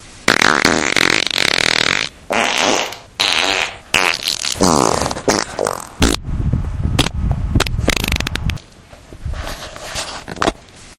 musical farts
If I could only hit the high notes!